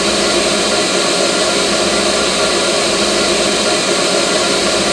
Created using spectral freezing max patch. Some may have pops and clicks or audible looping but shouldn't be hard to fix.
Everlasting, Sound-Effect, Soundscape, Atmospheric